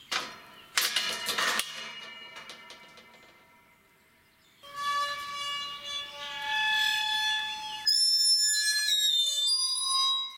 Scary old rusty gate squeaking
Just as the title says. It was really an old rusty gate :D
creak, creaking, creaky, door, gate, hinge, hinges, horror, rusty, scary, screech, squeak, squeaking, squeaky, squeal